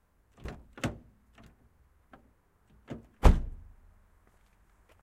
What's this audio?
Opening and closing car door